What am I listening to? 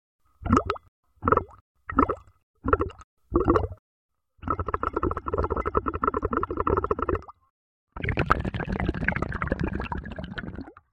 bubbles with drinking straw in glass of water
Sound of bubbles made with a drinking straw in a glass of water.
Recorded with the Fostex FR2-LE and the JrF D-Series Hydrophone.